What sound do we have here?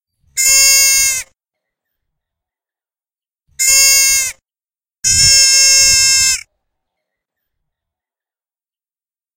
baby deer4
Sound of a fawn crying, made by changing the pitch and tempt of a lamb crying
forest-animal, fawn